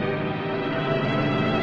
Old Strings
New Orchestra and pad time, theme "Old Time Radio Shows"
strings, ambient, oldskool, soudscape, scary, pad, orchestra, background, radio, silence